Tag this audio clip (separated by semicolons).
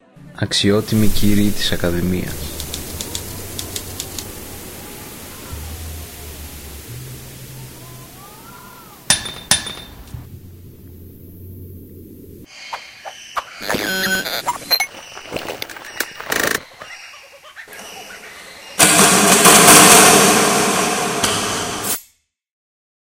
blog; presentation; soundboard; report